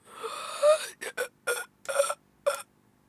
Good quality zombie's sound.